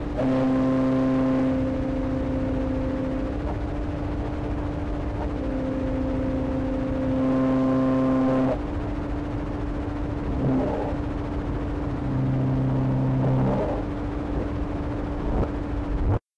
pre-recorded organ sounds run through a SABA television at high volume; recorded with peak and processed in Ableton Live
peak, distorted, buzz, noise, distortion, scream, organ, flutter